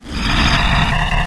guacamolly med
Same as _hev without the panning highs.
squeal, screech